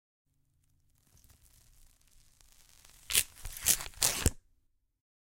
FX Mask Pulled Off 01
Special effect of a printed face mask pulled off from our podcast "Mission: Rejected".
peel, mask, off